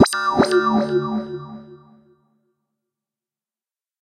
Triangle oscilator envelope
env, f, x